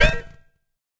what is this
STAB 001 mastered 16 bit
A short sound that could be coming form a cartoon. Created with Metaphysical Function from Native
Instruments. Further edited using Cubase SX and mastered using Wavelab.